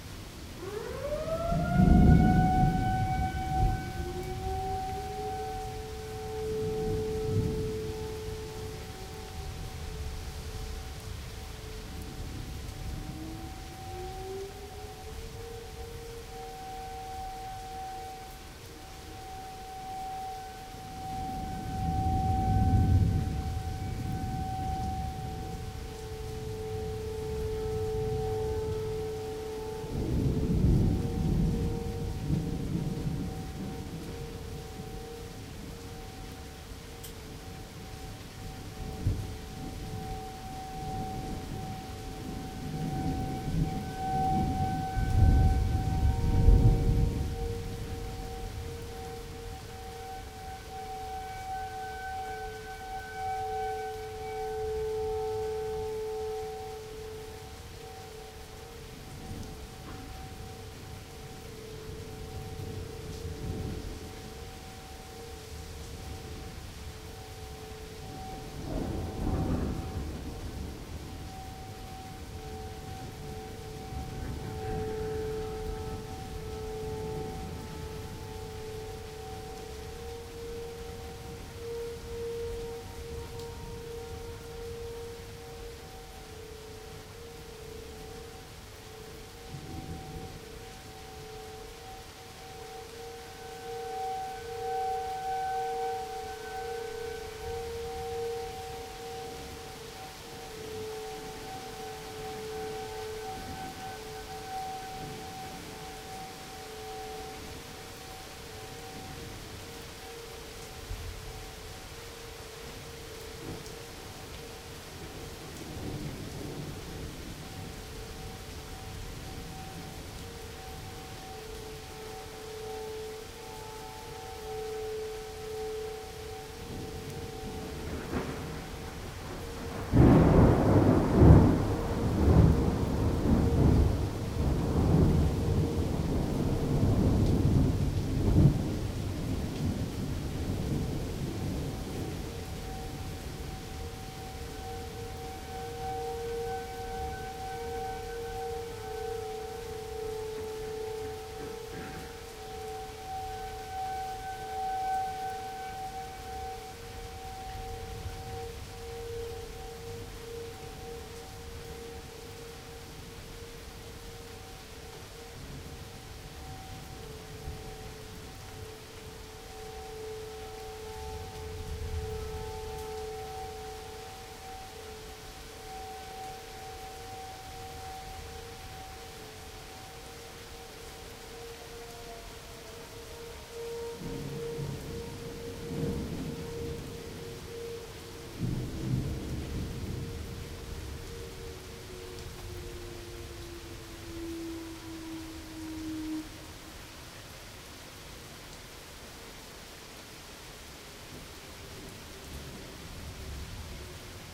Thunder Rain Siren.L
Summer storm. Thunder, rain, and tornado siren. Midwest, USA. Zoom H4n, Rycote Windjammer